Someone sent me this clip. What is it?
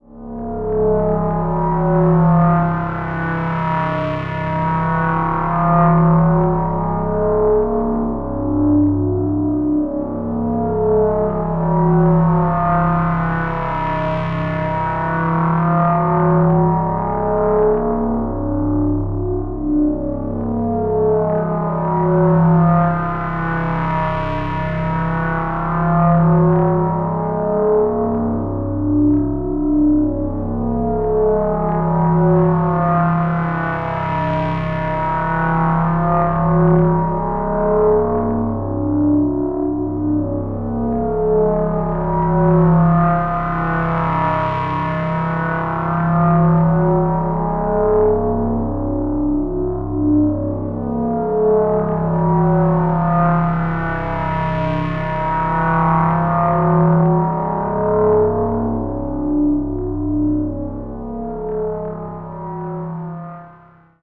Tension Pad 1 - 48-24-mono
Tension Pad 1 Texture
Texture, Scary, Tension